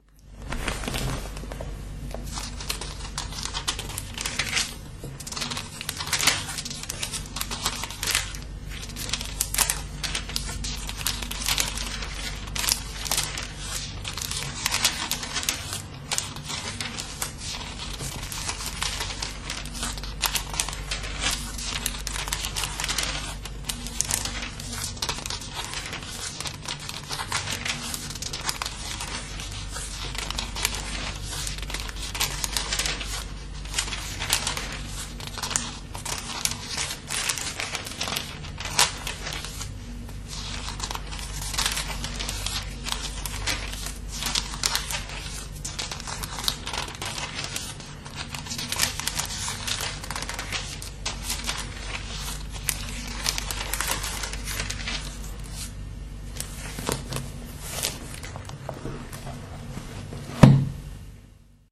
Turning the pages of the book Ezekiel in the bible (dutch translation) the church has given my father in 1942. A few years later my father lost his religion. I haven't found it yet.
turning-pages, paper, book